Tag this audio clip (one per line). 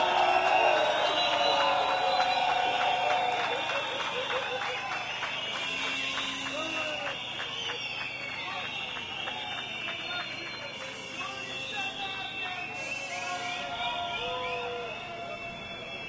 against
people
whistle